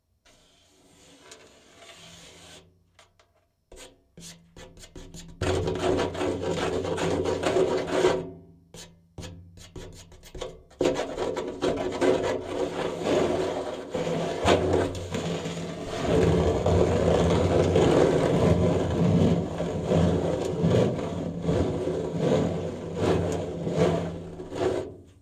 scraping plastic cup on metal01
Contact mic on a large metal storage box. Rubbing a plastic cup upside down on the surface.
rough, rubbed, rub, contact-mic, friction, grinding, rubbing, scrape, sawing, metal, piezo, metallic, scraping, grind